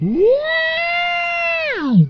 My crazy sister's scream slowed down a ways. Made with Audacity
eek, shriek, scream, yell